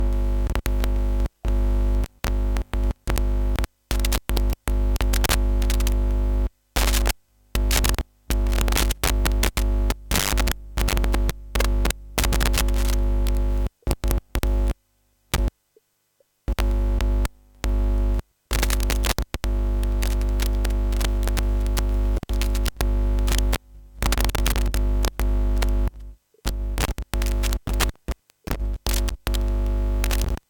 Audio Jack Plug
Fiddling around with an audio jack connector.
Recorded with Zoom H2. Edited with Audacity.
broken, connection, disconnect, electrical, glitch, shock